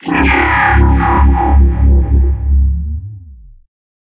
Time Travel
A sci-fi sound I made by heavily processing an extremely fast drumloop in FL Studio.